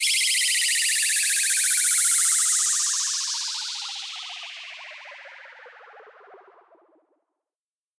Noisy Neighbour 2
rising, sound-effect, sweeping, fx, sweeper, sweep, riser, effect